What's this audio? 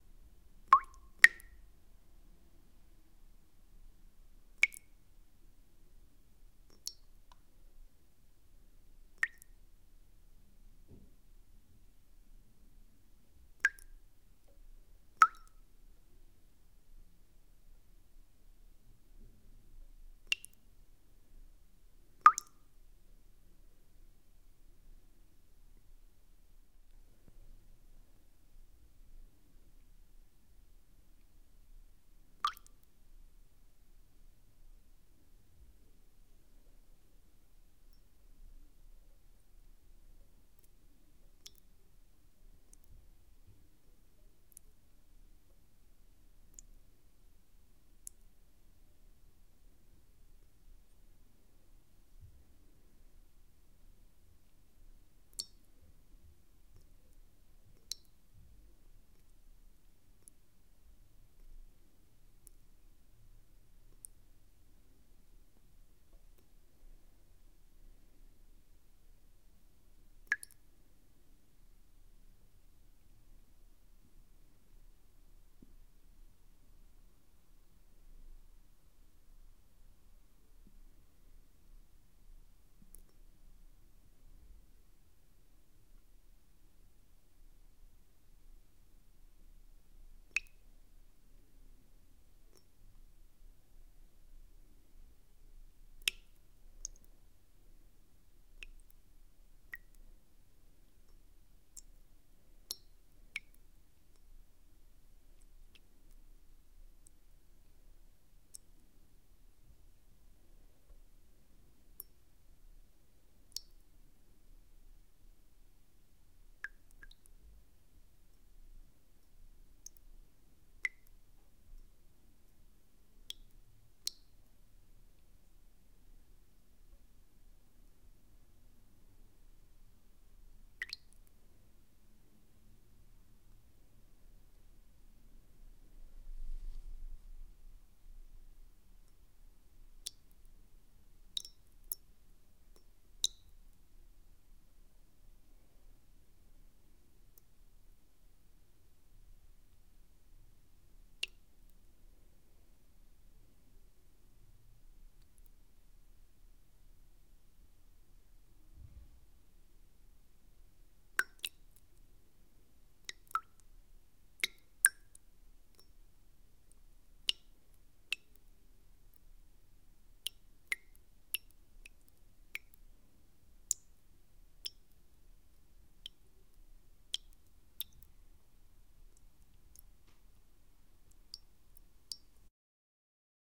Some water drops in a bowl, made manually with a spoon (and love).
Recorded on a Zoom H4N and a large membrane cardioid mic.